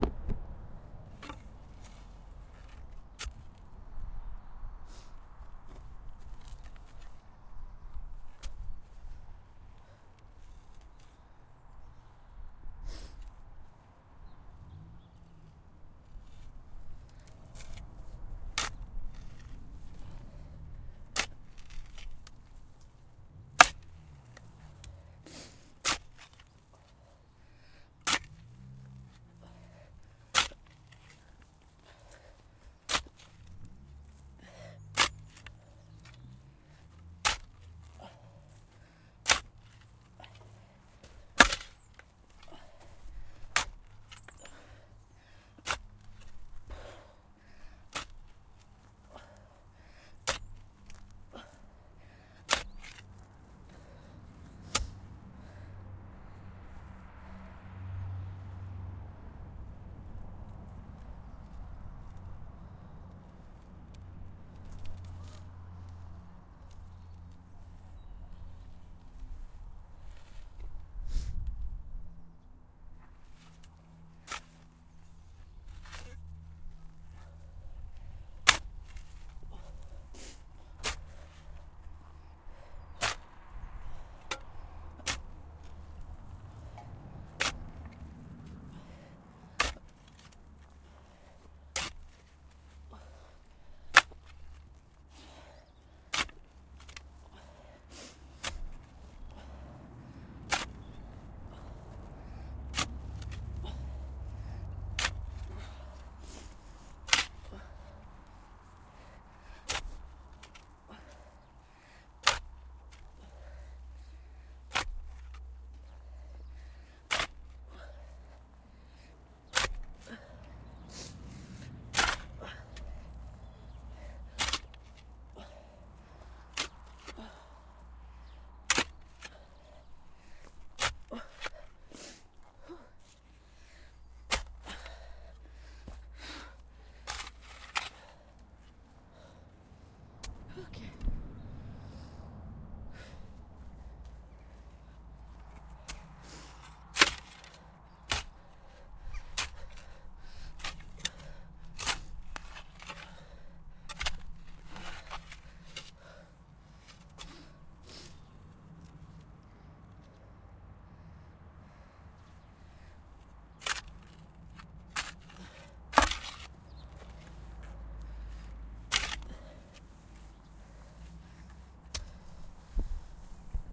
Digging3Tired:Vocal
A recording of me digging a grave for a film I was making. By this point I was very tired in the sun, so expect heavy breathing, sniffling and tiresome digging.